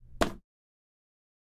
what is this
Salto En madera
jumping on wood
jump, wood, hit